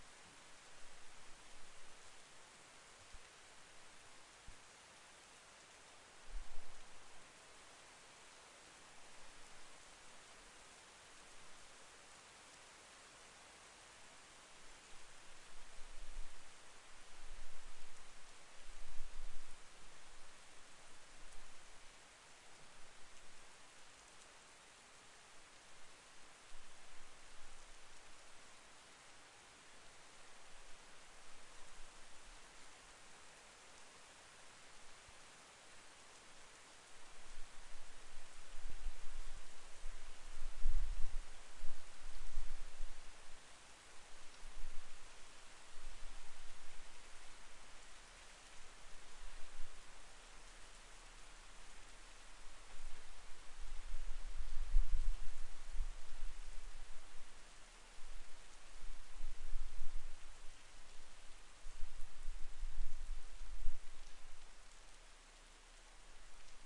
Recorded with Oktava MK-012 through the window of the apartment on the second floor.
field, raining, recording, wet